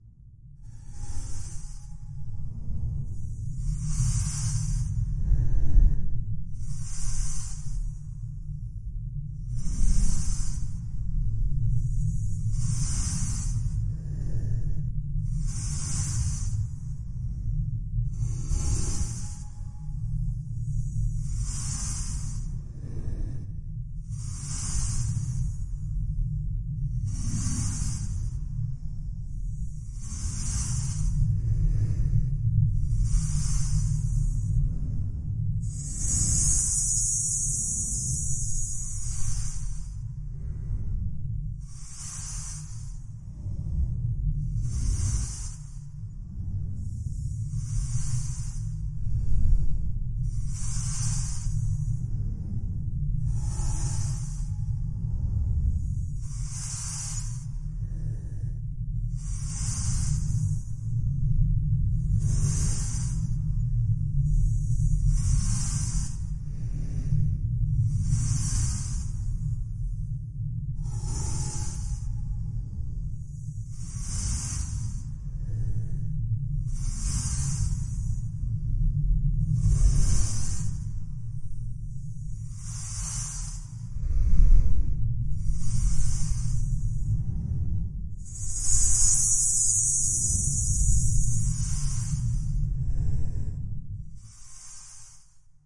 Ambient horror with a feeling of being underwater with breathing effects
underwater, horror, breathing, ambient, submerged, creepy